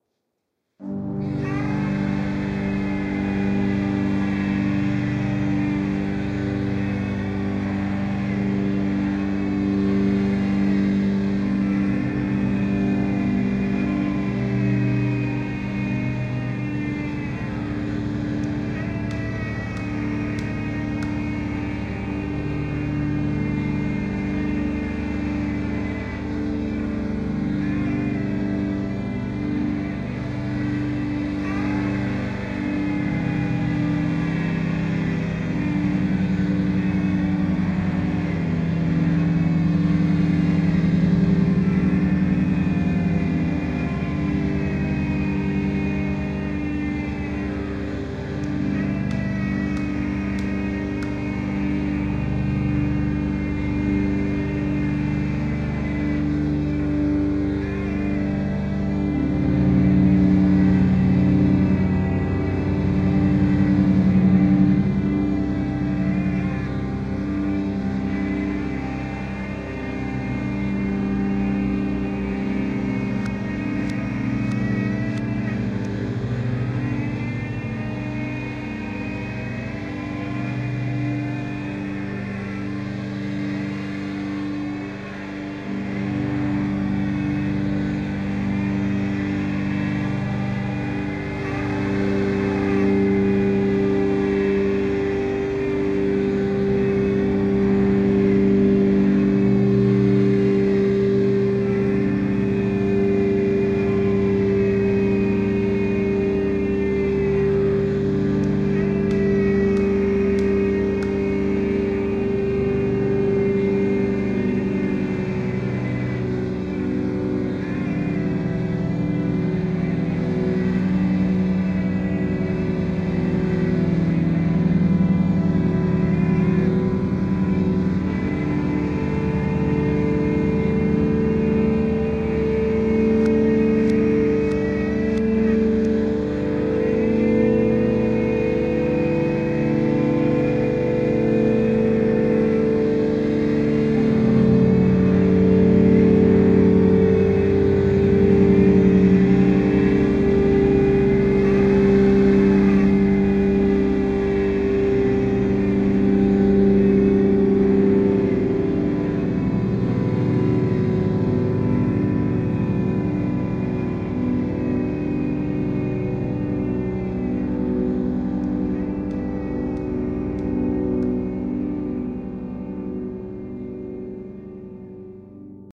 Ceremony of the Unspeakable
Zombies doing whatever zombies do when they are feeling exultant and joyous...about brains.
Halloween, spooky, eerie, dreamlike, horror, distant, ambient, strange, uneasy, haunted